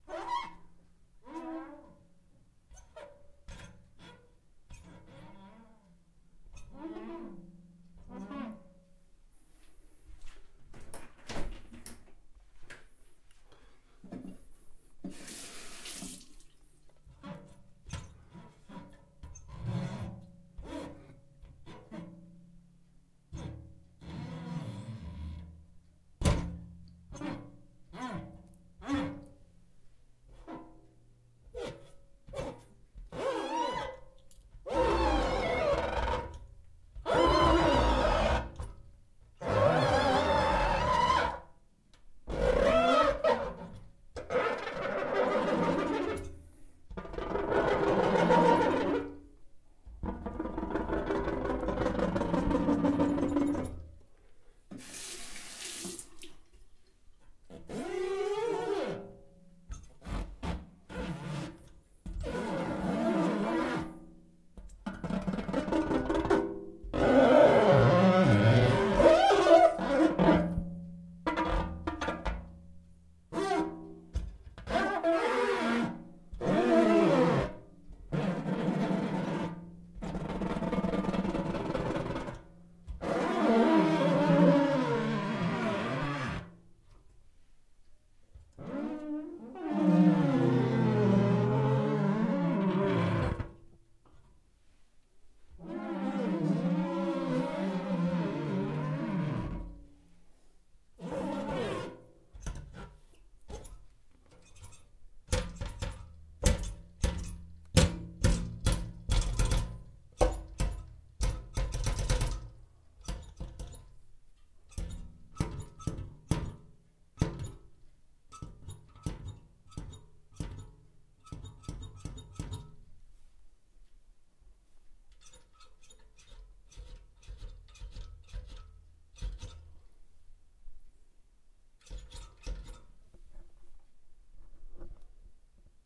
mirror wet hand squeak squeal creak
Playing around with a mirror and a wet hand wipe smearing about it.
glass, mirror, smear, smearing, swipe, wipe